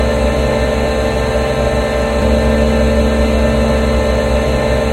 Created using spectral freezing max patch. Some may have pops and clicks or audible looping but shouldn't be hard to fix.
Atmospheric, Background, Everlasting, Freeze, Perpetual, Sound-Effect, Soundscape, Still